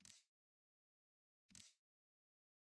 Dripping Kitchen Tap
Dripping water, kitchen tap